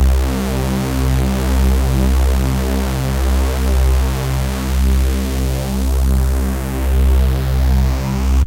Mag Reese Bass 2
Another 8-second reese bass I made using a synthesizer and various effects. It is in the note of C.
bass, dnb, dubstep, hoover, low, production, reece, reese, stab, synth, techno